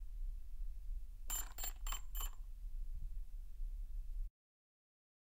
Quadrocopter recorded in a TV studio. Sennheiser MKH416 into Zoom H6.